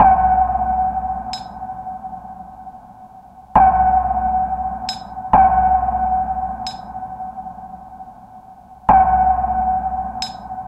Ambient Groove 001
Produced for ambient music and world beats. Perfect for a foundation beat.
loops, ambient